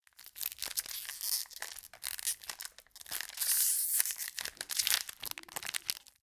opening nuts
Opening a bag with nuts.
bag, nuts, open, opening